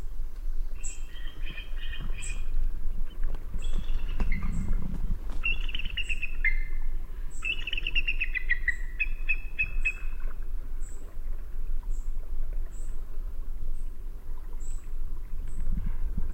Bald Eagle
Field Recording from Glacier Bay, Alazka recorded by Marylou Blakeslee
You'd expect this bird to have a "larger cry"